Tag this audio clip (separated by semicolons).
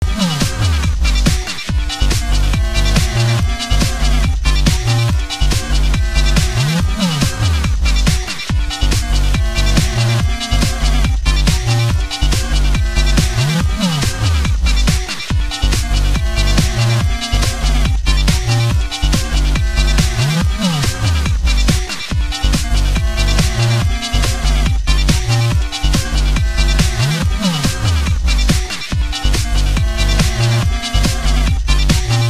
Background; battle; Boss; fight; Game; Gaming; level; music; Pixel; Retro; Soundtrack